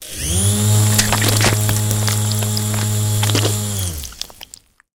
The sound of a skull being drilled open and the brains splurging out. Inspired by the grasshopper mask's default weapon in Hotline Miami.
Edited with Audacity.
Plaintext:
HTML: